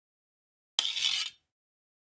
Sliding Metal 02
blacksmith, clang, iron, metal, metallic, rod, shield, shiny, slide, steel